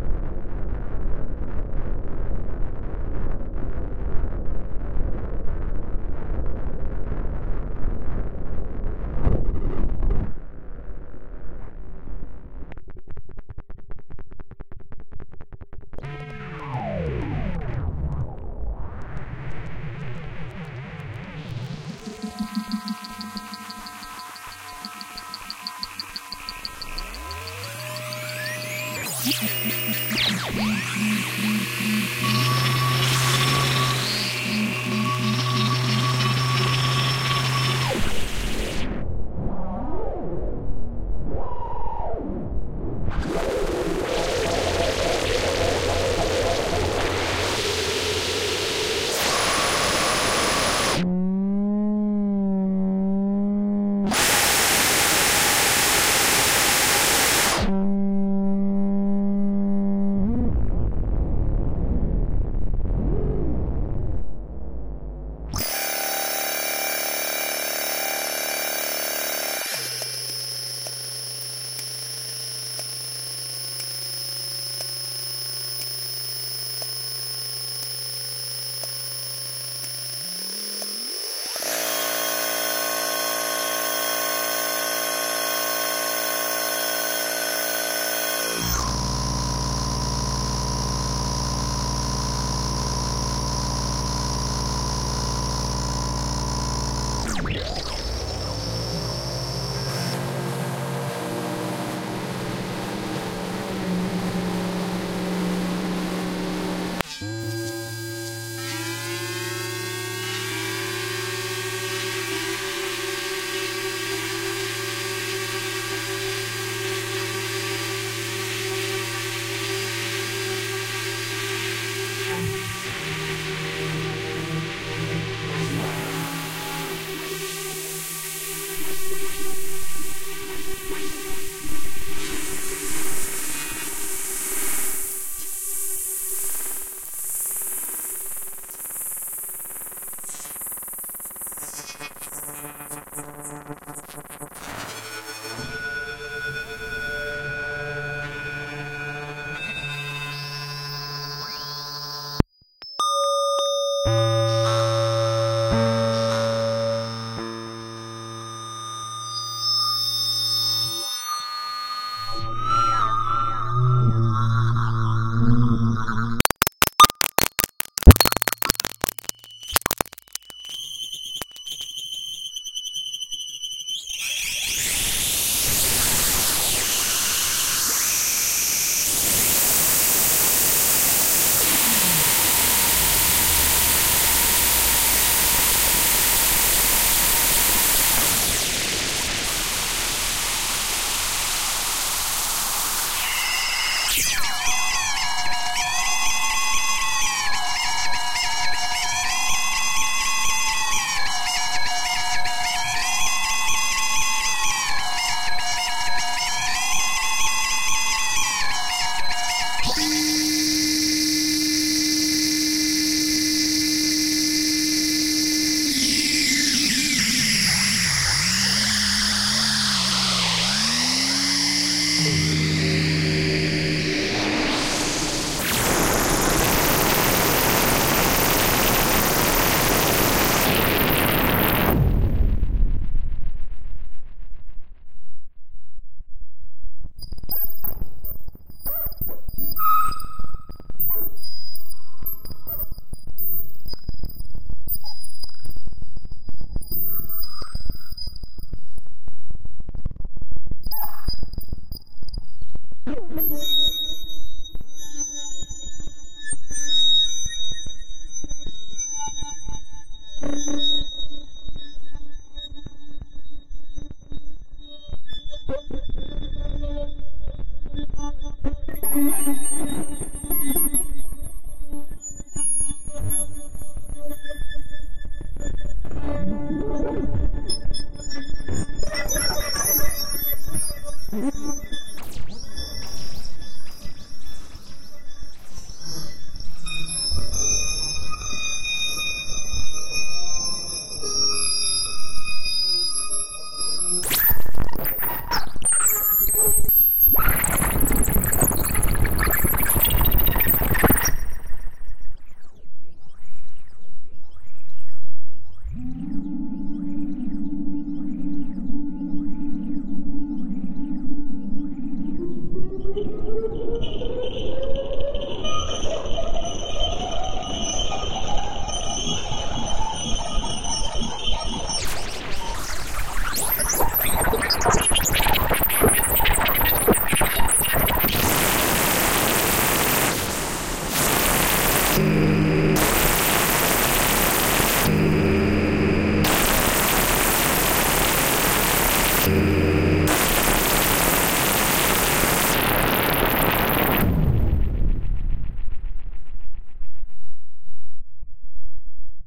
Sound Design Glitch Abstract Reaktor
Every now and then I get on Reaktor and jam out a load of abstract weirdness to cut up and be used or further processed, I am uploading some of the raw, continuous files for you to do the same. They tend to be kinda aggressive, but not always and not unusably so (in a commercial sense)
Technology, Sci-fi, Robotic, Abstract, Weird, Random, Wipes, Design, Sound-Design, Sound, Reaktor, Glitch